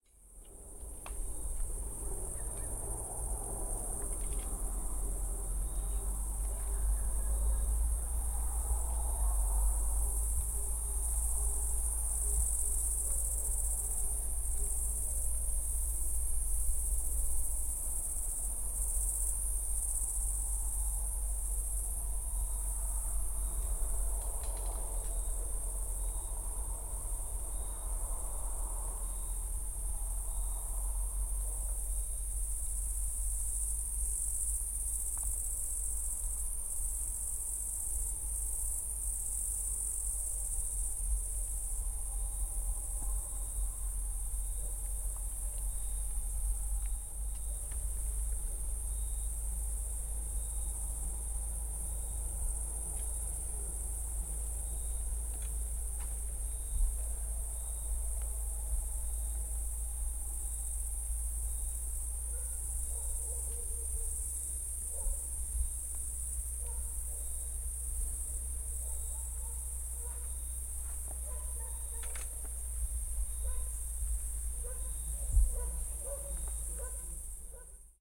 amb forest night
Ambience of a forest in the village Pontoiraklia, Kilkis in Greece, recorded during a summer night.
ambience, atmo, atmos, atmosphere, background, background-sound, field-recording, forest, moon, night, outdoor, soundscape